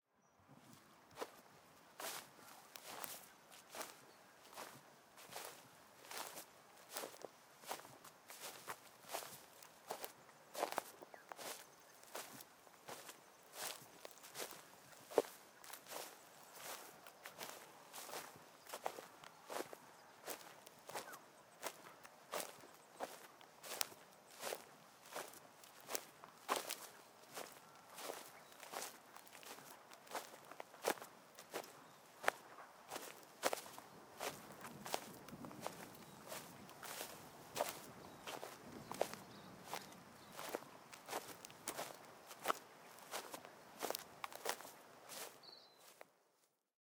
Walking in medium length cut dry grass Ver01
Walking in medium length dry grass, mic was close, some birds in the bg.
dry, Walking